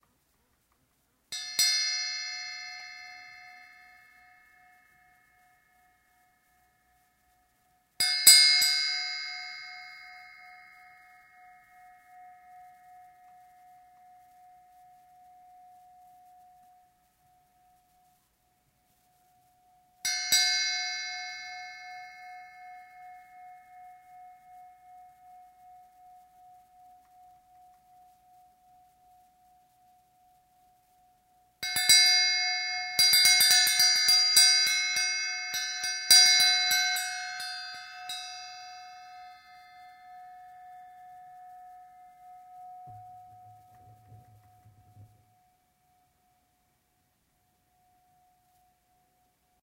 Various rings of an old small, round bell. Includes resonance, natural end. Close.